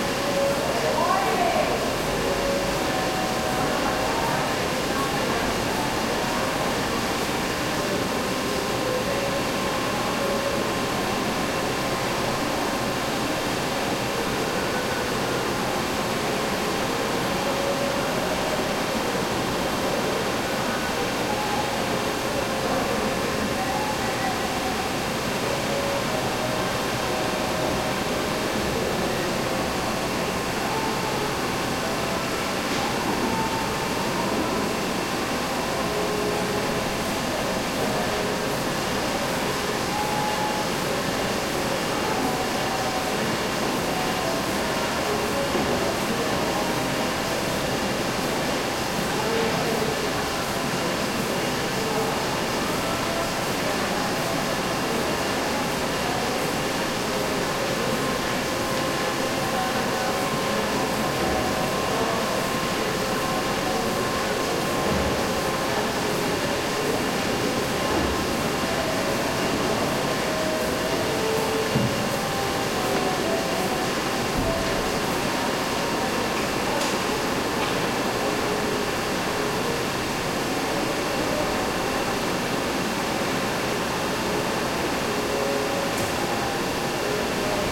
print shop small loud Havana, Cuba 2008